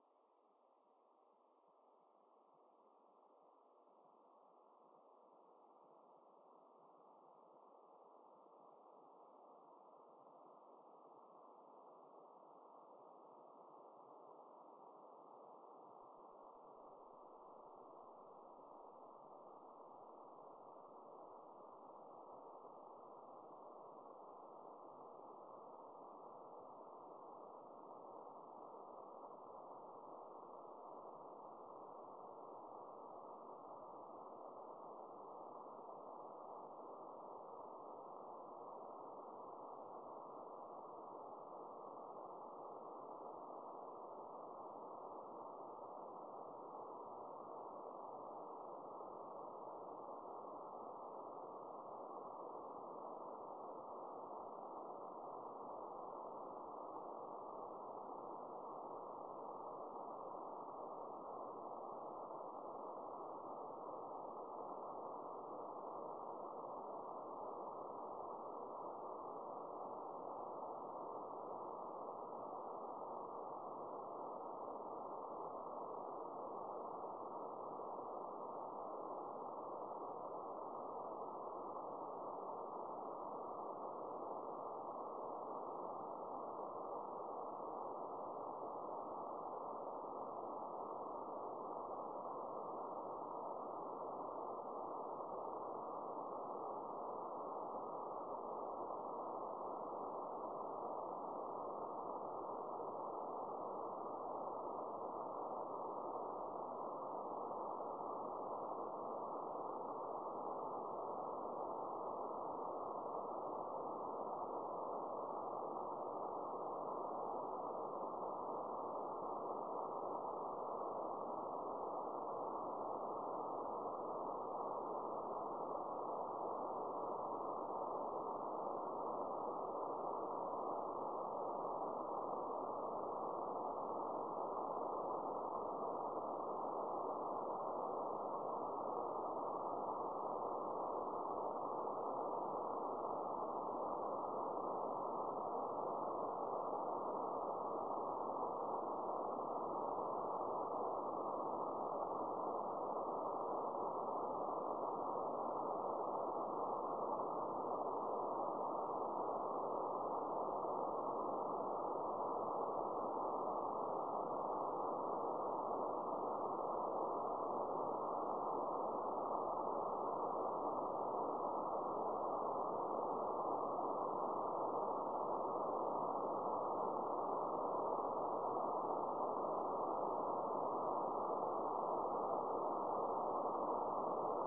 AAU CUBESAT 2011.08.06.20.03.04
cubesat, fountain, satellite